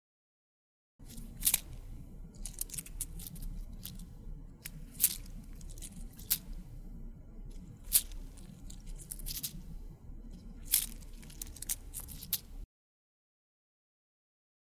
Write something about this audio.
Hyacinthe remove place elastic beaded bracelet edited
remove place elastic beaded bracelet